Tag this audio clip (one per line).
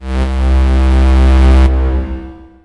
pad
reaktor
saw